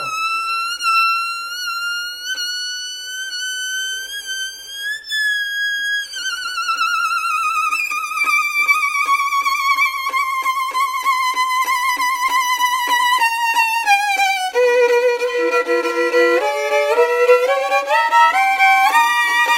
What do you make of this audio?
Violin pain
Recording of a Violin
Acoustic, Instruments, Violin